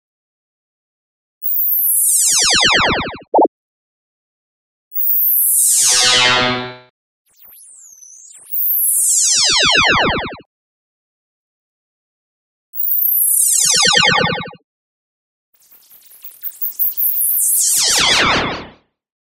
I’m not sure what to call this certain sound but in a nut shell, this sound before the beep is the original sound that I created and the ones after the beep are remixes from filter, spectrum buffs and other effects. Created using Gold-Wave
sifi, machine, robotic, space, ascending, android, retro, teleport, descending